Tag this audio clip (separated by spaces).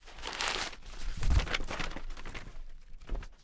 falling air impact paper tossed page rustling shuffling into papers pages